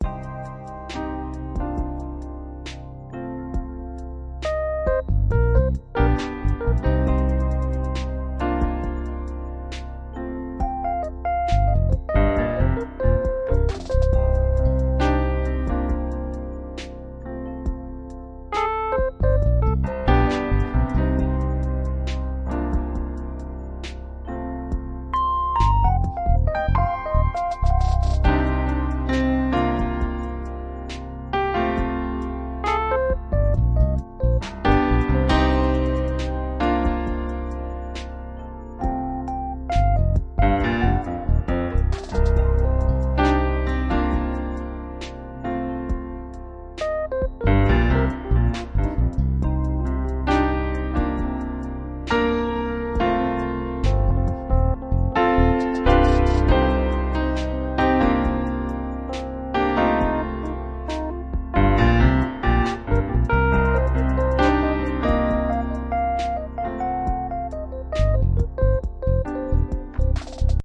Smooth 68.1 CM
These loops are a set of slow funk-inspired jazz loops with notes of blues overlaying a foundation of trap drums. Slow, atmospheric and reflective, these atmospheric loops work perfectly for backgrounds or transitions for your next project.
ambience, jazz